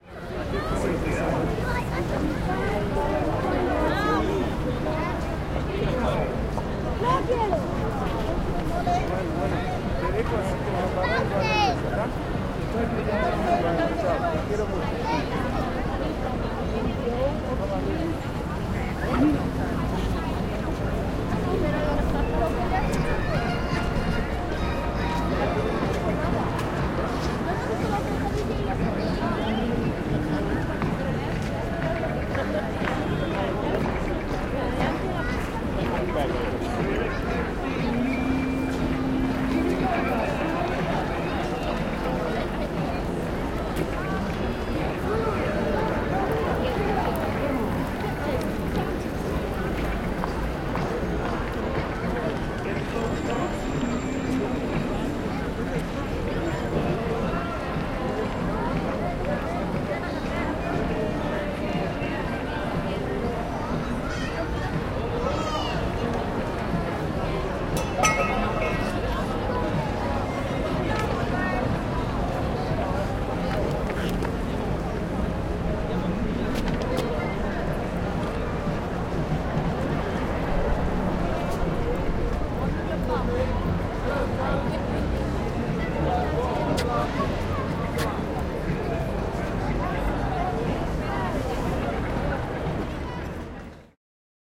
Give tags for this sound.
atmo city england field-recording london monument noisy people tourist traffic

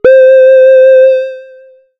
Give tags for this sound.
triangle basic-waveform multisample reaktor

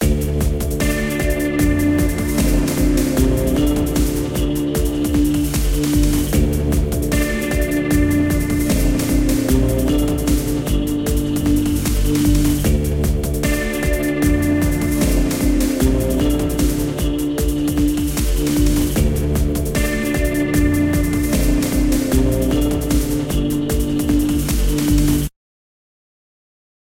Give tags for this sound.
crime detective loop mission spy stakeout stealth verdict

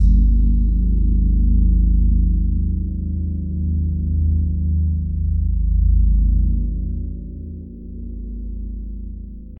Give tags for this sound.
sample manipulated algorithmic

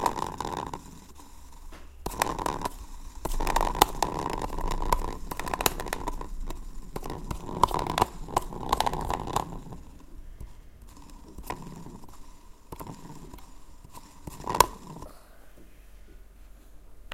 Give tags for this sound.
Belgium mySound Plakband Sint-Kruis-Winkel

